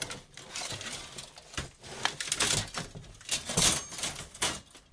Rummaging in closet
clatter, objects, random, rumble, rummage